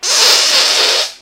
Another fart. This one had a lot of noise to it.
fresch, flatulence, butt, noise, flatulation, disgusting, farting, real, smelly, flatulate, gas, legit, loud, diarrhea, high-quality, fart, nasty